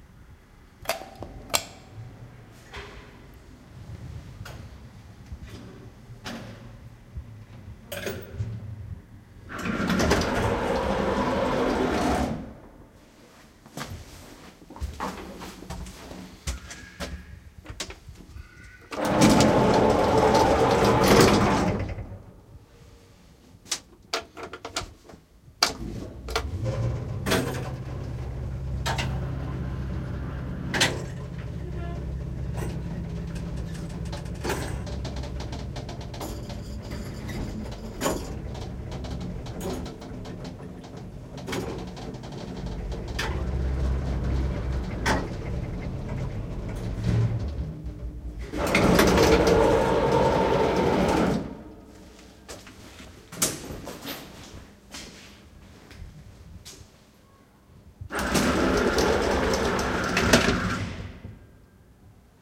An elevator trip in old panel 9th floor USSR house.
Wall-button
press: elevator call.Elevator arrives, doors opens, i walk in.An
elevator trip up from lowest floor to highest.Recorded with Zoom H2,
4-mics mode, then filtered a bit and mixed manually.
doors, close, up, household, elevator, open, house, lift, city